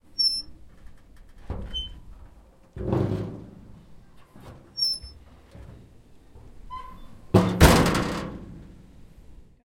Found an old abandoned truck on a hike - recorded the squeaking and creaking of the doors opening and closing and stressing different parts of the metal. (It was done outdoors, so there may be some birds)